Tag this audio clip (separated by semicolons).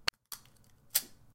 Chop; knife; Slash